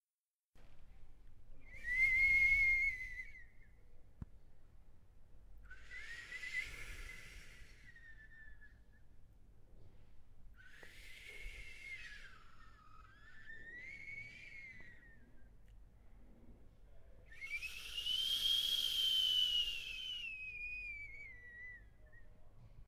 Viento silbido 1
Sound generated by the appearance of the air in outdoor. Sluggish intensity level.